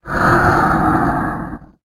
Monster roar.
Created from a clip of me inhaling air.
This sound, like everything I upload here,